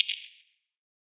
This sample was created in Ableton Live 7 using xoxos excellent percussion synthesis plug-in Snare. For this sample the sound filtered using a bandpass filter set to high frequencies and added a touch of echo. At these filter frequencies, the sound most closely resembles a finger snap.